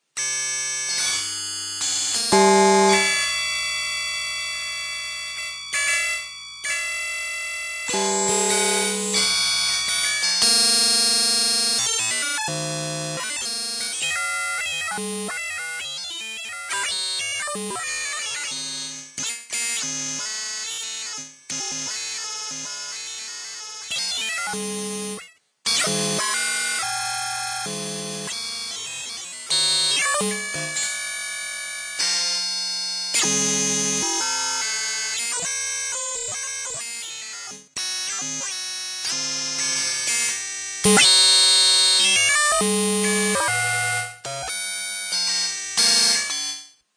A kind of digital noize recorded from broken Medeli M30 synth. All this sounds appears only by moving the pitchwheel!